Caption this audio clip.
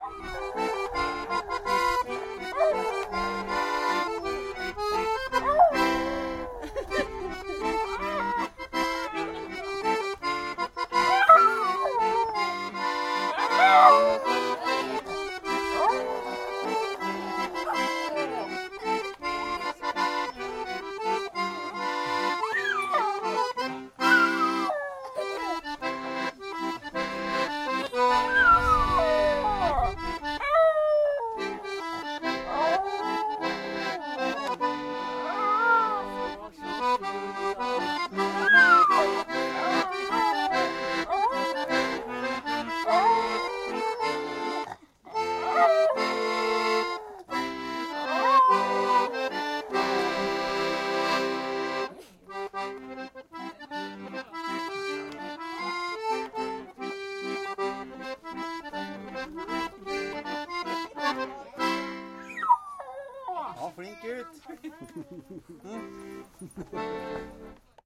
Dog singing, while accordion is being played. Voices in background. Norwegian. Tascam DR-100.
dog, accordion, bark, laughter